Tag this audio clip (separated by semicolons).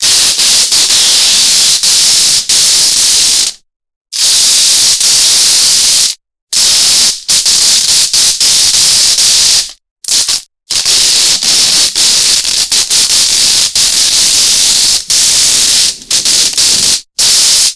Burst; Energy; Loud; Noise; Zap